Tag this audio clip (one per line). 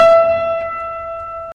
keyboard
e
keyboard-note
note
notes
piano-note
keyboard-notes
piano
piano-notes